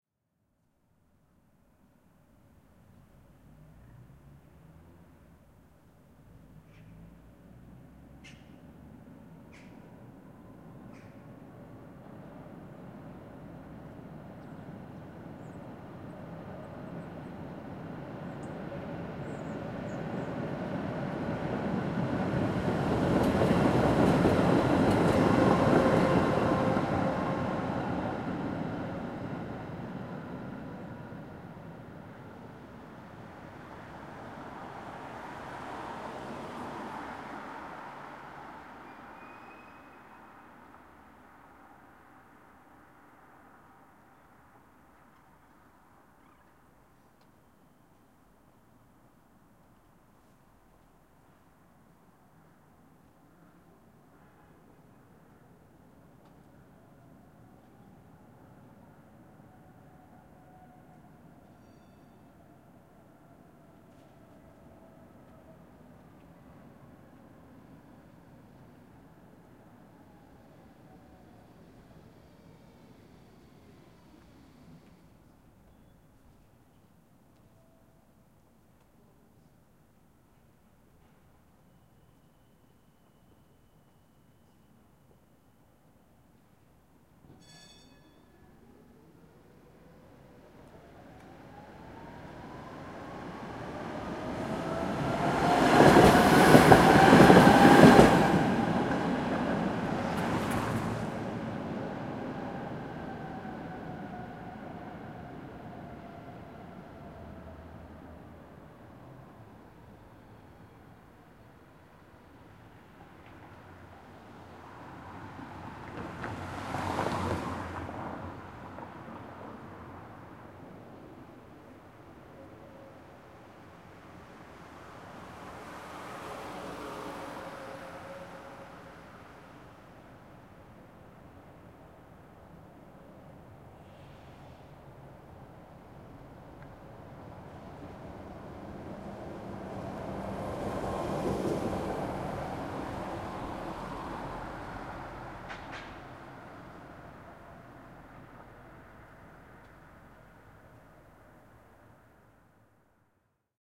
Brno's street ambience excerpt. Cars and trams passing by from different sides and at different distances, birds, people, noise.
Recorded in Brno at Udolni street, using Tascam recorder, windscreen and tripod.
In case you use any of my sounds, I will be happy to be informed about it, although it is not necessary. Recording on request of similar sounds with different technical attitude, procedure or format is possible.